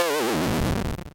Simple retro video game sound effects created using the amazing, free ChipTone tool.
For this pack I selected the LOSE generator as a starting point.
It's always nice to hear back from you.
What projects did you use these sounds for?
8-bit, again, arcade, chip, classic, computer, damage, deadly, death, eightbit, electronic, end, fail, failure, finish, game, hit, hurt, loose, lose, loser, over, problem, raw, retro, start, video